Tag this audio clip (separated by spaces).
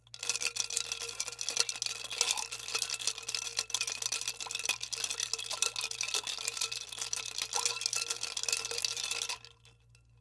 Liquid stirring glass ice utensil